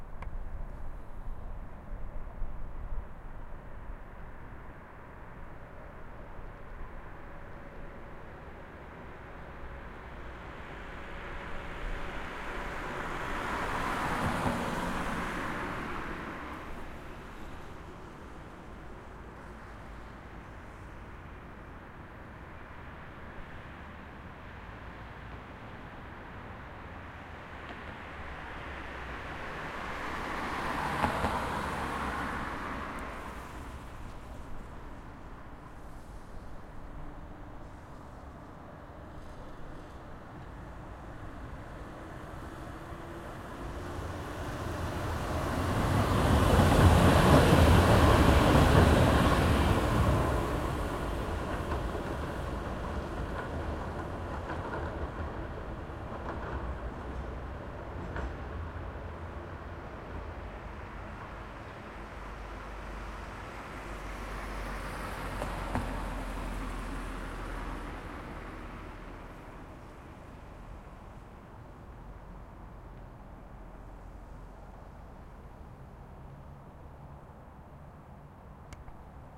Tram passing dry xy120
Field recording of a tram passing. This one has long lead in with the tram @45". Recorded on a Zoom H4n using on-board microphones in xy120 degree configuration.
passing, tram, field-recording